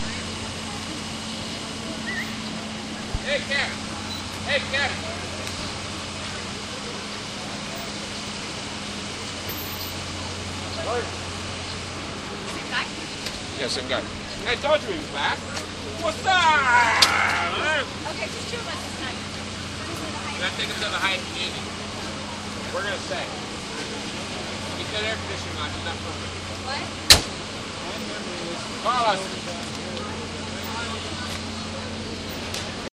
A man hails a cab for his wife and daughter while he trudges on outside the Natural History Museum on the National Mall in Washington DC recorded with DS-40 and edited in Wavosaur.